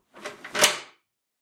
Bolt Lock 2
deadbolt / lock being used
Bolt, chest, key, Lock, Metal, turn, Unlock